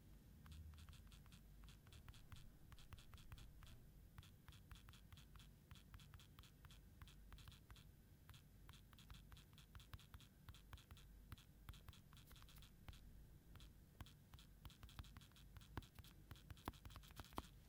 sms texting with vibrations
Sms texting with vibration option
phone, sms, mobile, message